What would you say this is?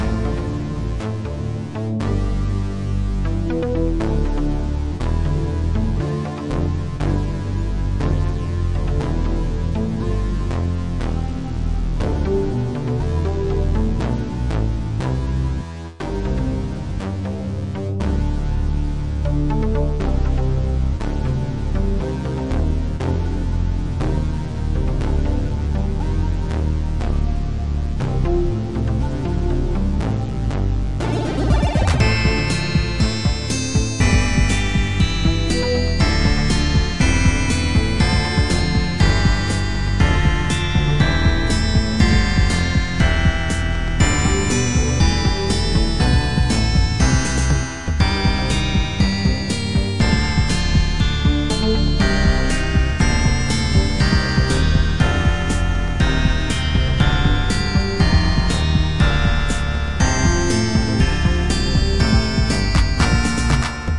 short loops 31 01 2015 c 1
short, tune, music, loop, gameloop, 8bit, game, retro, melody